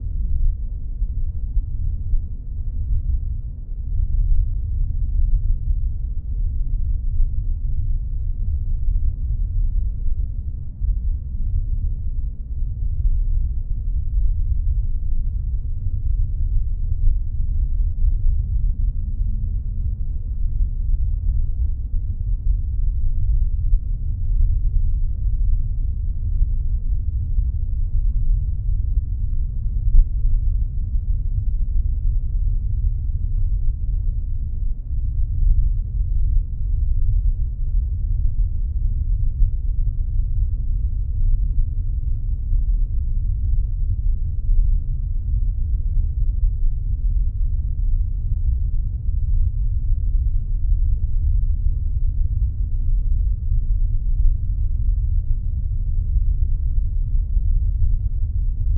bass rumble deep subterranean subsonic
rumble, deep, subsonic, subterranean, bass